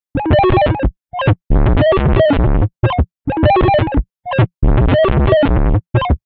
Sonification of collision display data from the CERN Large Hadron Collider. Sonification done by loading an image from the ATLAS live display and processing with a Max/MSP/Jitter patch. This clip is post-processed for crunchy goodness.
data, glitch, lhc, loop, physics, proton, sonification